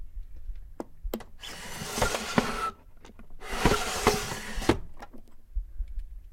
Old Drawer Open.Close
Opening and closing a old squeaky drawer